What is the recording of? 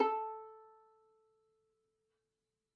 One-shot from Versilian Studios Chamber Orchestra 2: Community Edition sampling project.
Instrument family: Strings
Instrument: Solo Violin
Articulation: pizzicato
Note: A4
Midi note: 69
Midi velocity (center): 95
Room type: Livingroom
Microphone: 2x Rode NT1-A spaced pair
Performer: Lily Lyons
single-note, midi-note-69, violin, vsco-2, solo-violin, pizzicato, strings, multisample, midi-velocity-95, a4